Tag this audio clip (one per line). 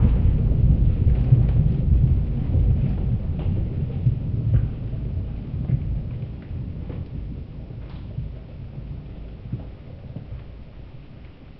noise
nuclear
rain